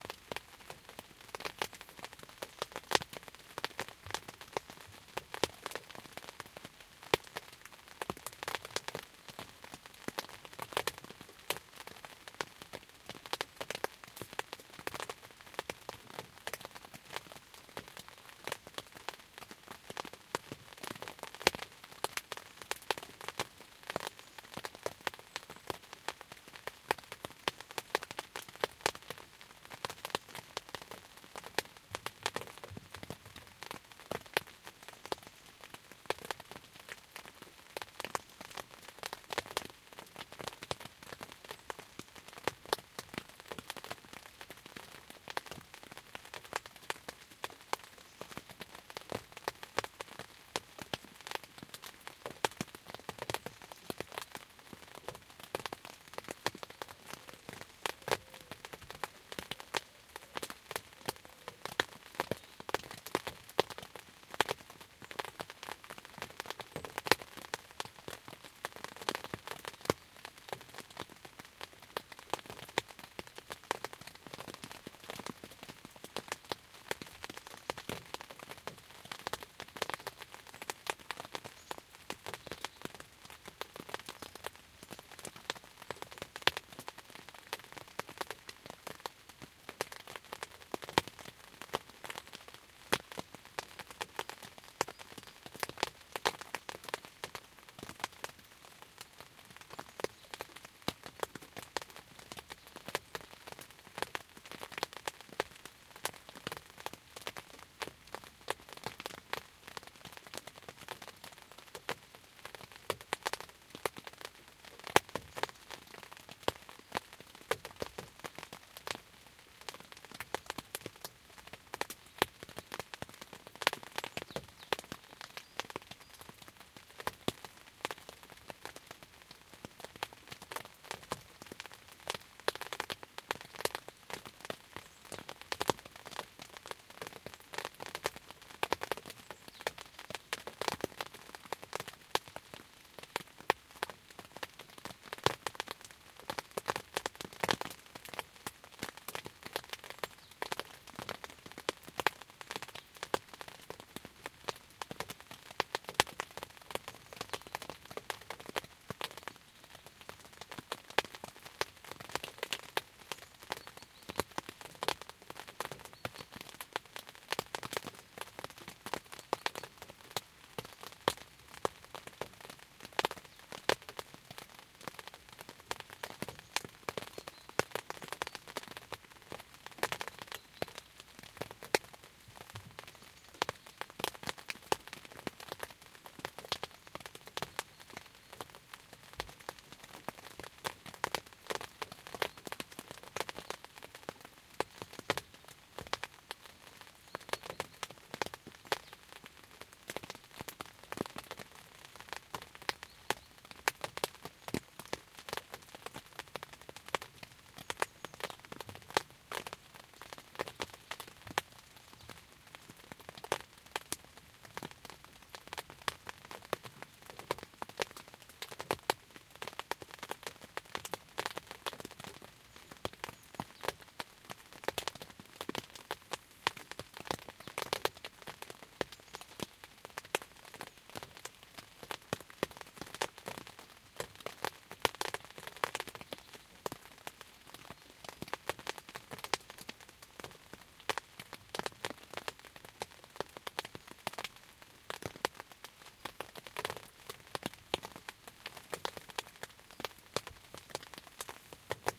In the Tent - Rain
Rain is falling on a tent. Nothing more to say ;-)